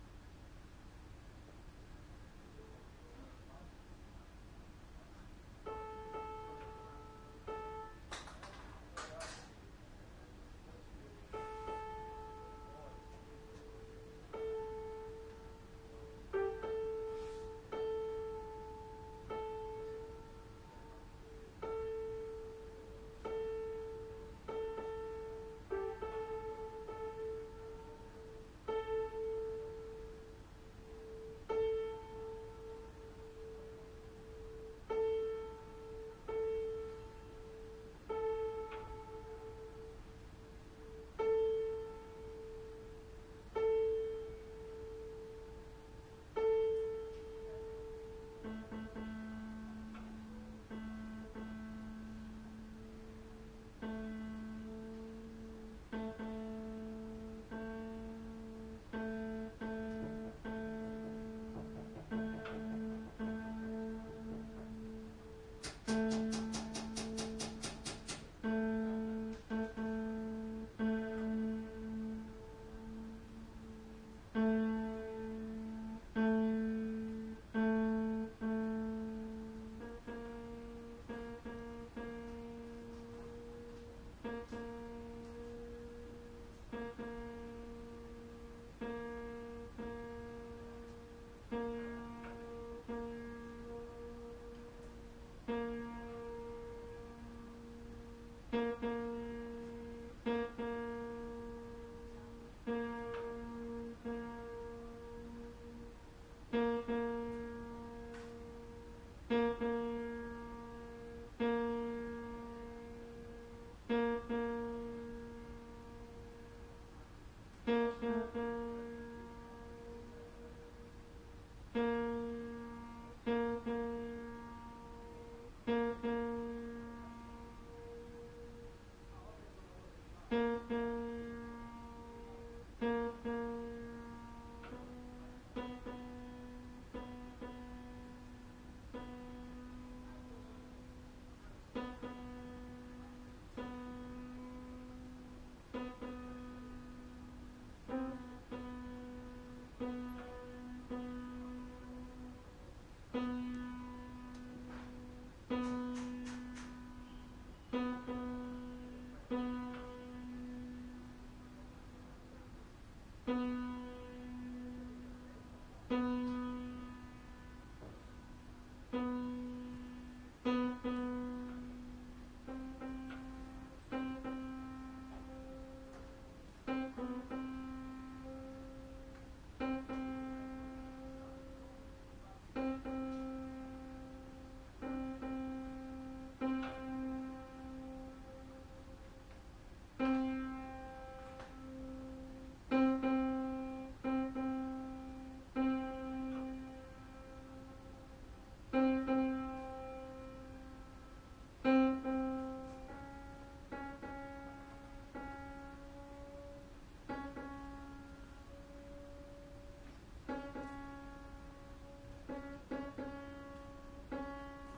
081011 01 tuning piano background noise
piano tuner, first day
background, noise, piano, tune